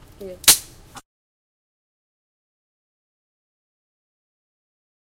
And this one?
Ruler Snapping
sound of a ruler being snapped into a book